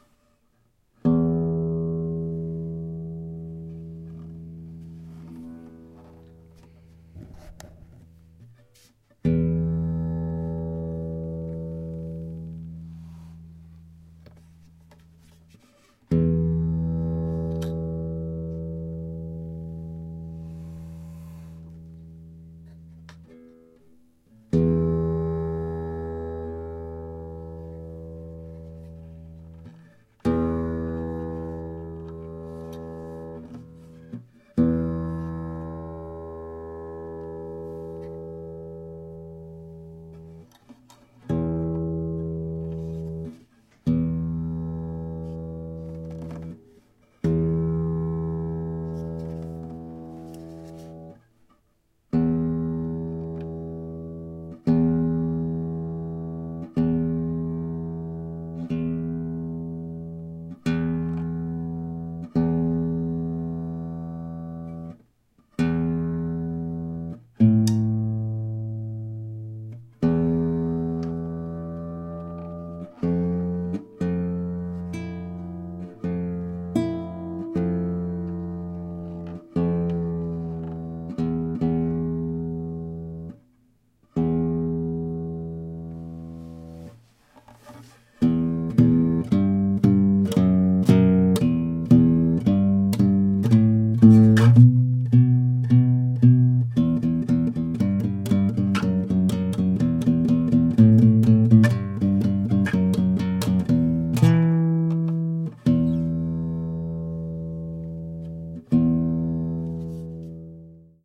Savarez-ESaite
This lowest E string (bass string) by Savarez (set for 15$) sounds quite smooth and yet full on a 59 cm (3/4) spanish guitar by LaMancha.
clean, string, nylon-guitar, single-notes, nylon, acoustic, spanish-guitar, guitar